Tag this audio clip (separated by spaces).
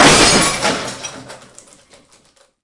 city clean field-recording high-quality industrial metal metallic percussion percussive urban